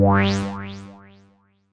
wah synth sound mad with Alsa Modular Synth
synth, wah